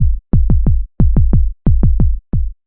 90 bpm ATTACK LOOP 3 kick element 2 mastered 16 bit
This is a component of a melodic drumloop created with the Waldorf Attack VSTi within Cubase SX. I used the Analog kit 1 preset to create this loop. Tempo is 90 BPM. Length is 1 measure. Mastering was done within Wavelab using TC and Elemental Audio plugins.
kick, electronic, 90bpm, loop